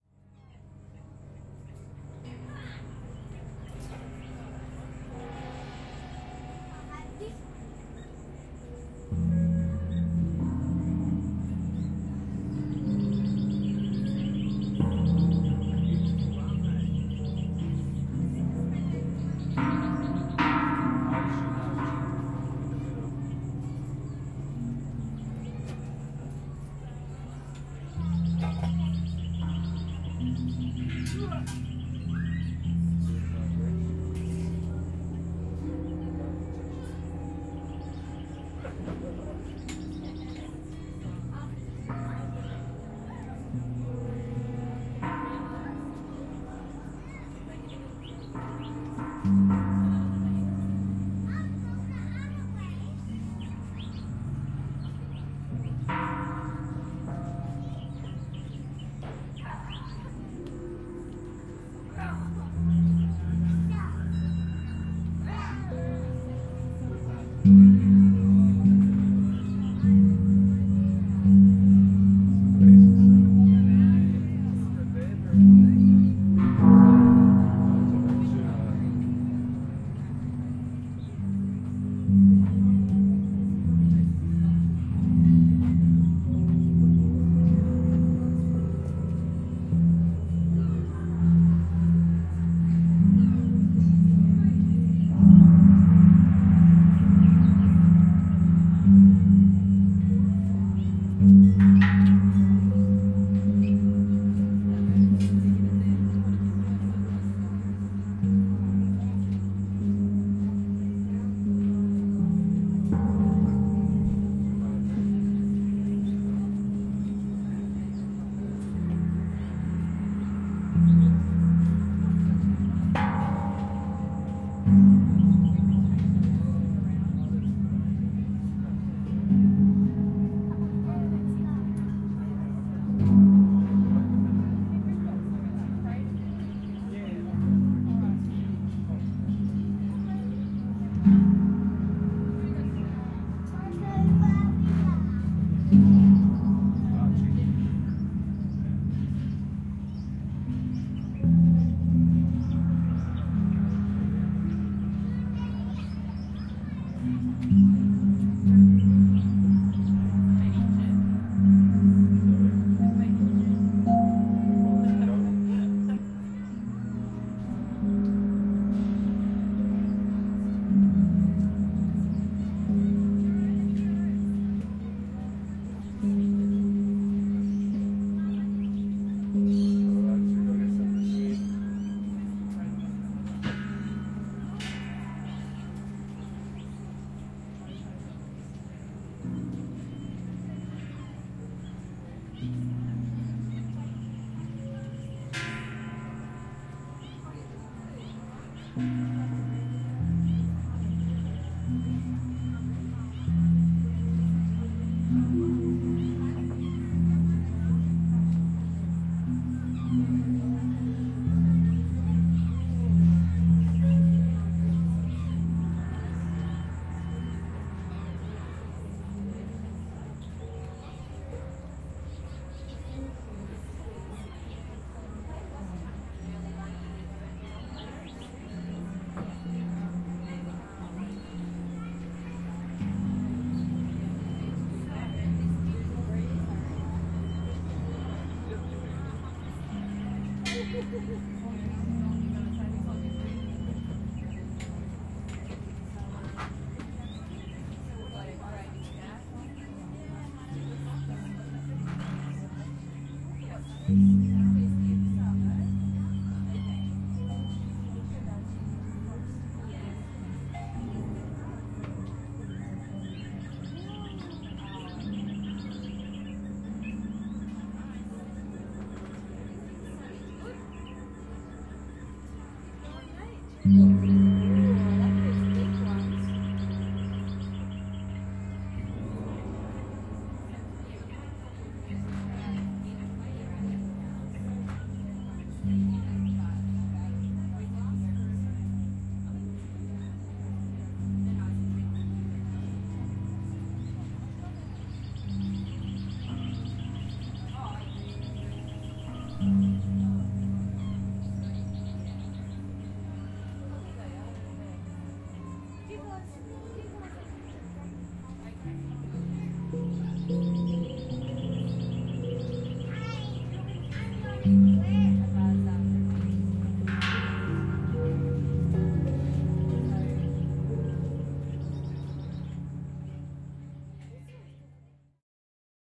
Gong Garden - Temptle Gongs Atmos
Recorded in Michael Askill's Gong Garden, Melbourne. The Gong Garden is an interactive soundscape, where people are invited to play, feel and listen to a myriad of gongs that are set out.
Temple Gongs